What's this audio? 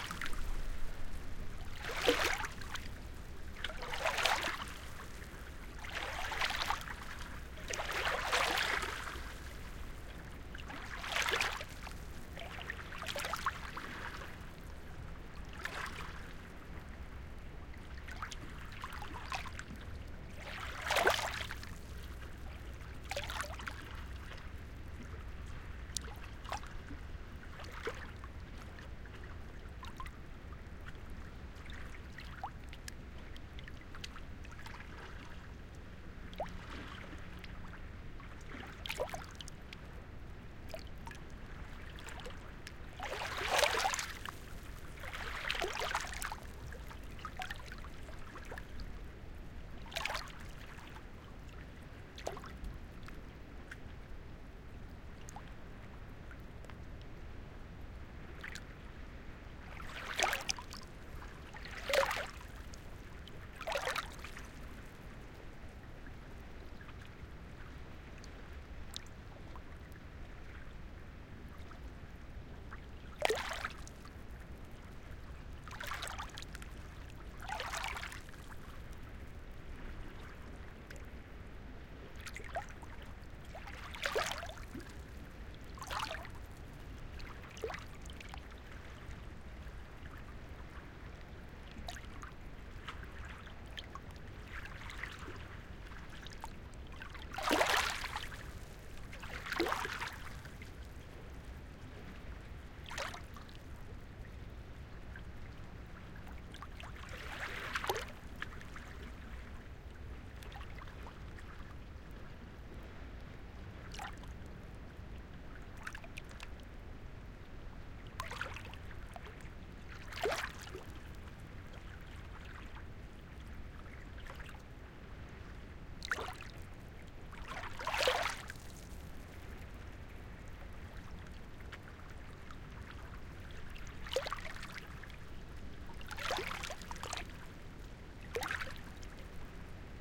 waves closeup
Close-up recording of mild waves. Water droplets almost hit the microphones.
close-up, calm, waves, field-recording, pure, sea, wave